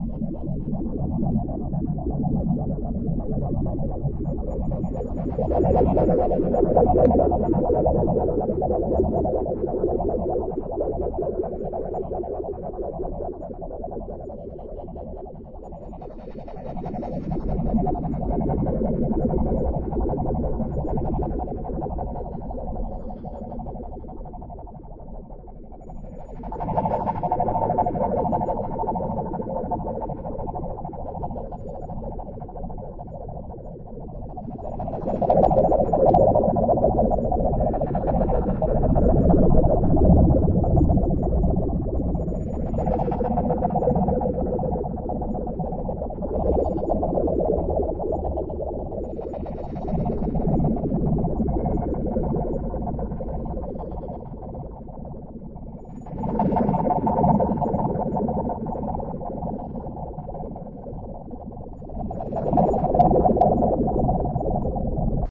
Sci-fi effect maybe for a space ship or whatever. _Credit: T.Hanson